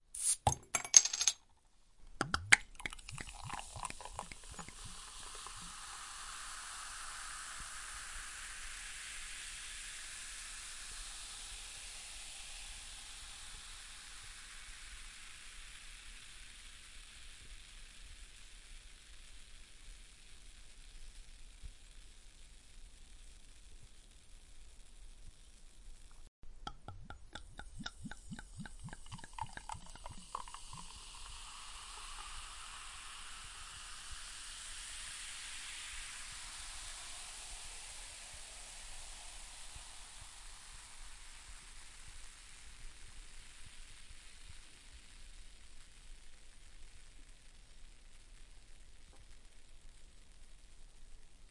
Soda pop open and pour
Opening a glass bottle of carbonated soda pop and pouring it in a glass.
carbonated, drink, fizzy, open, opening, pour